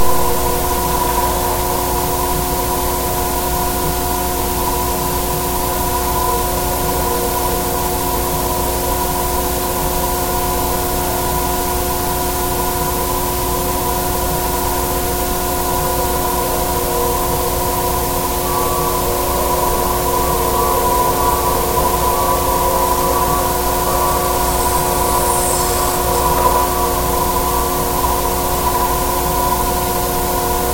Denver Millennium Bridge 03
Contact mic recording of the Millennium Bridge in Denver, CO, USA, from the lower southeast stay third from the pylon. Recorded February 21, 2011 using a Sony PCM-D50 recorder with Schertler DYN-E-SET wired mic.
contact-mic, Millennium-Bridge, normalized, construction-noise, contact, Sony, mic, Colorado, Denver, bridge, Schertler, DYN-E-SET, wikiGong, PCM-D50, contact-microphone, field-recording, construction